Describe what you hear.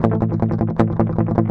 cln muted A guitar
Clean unprocessed recording of muted strumming on power chord A. On a les paul set to bridge pickup in drop D tuneing.
Recorded with Edirol DA2496 with Hi-z input.
clean, power-chord, strumming, drop-d, loop, 160bpm, les-paul, muted, guitar, a